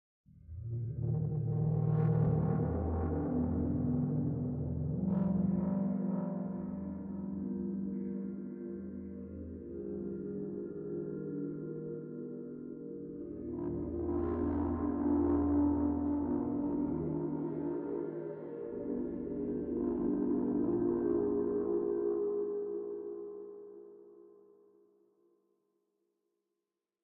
Some lift noises I gathered whilst doing foley for a project
sound-design, electric, ambience, lift, machine, noise, sounddesign, sfx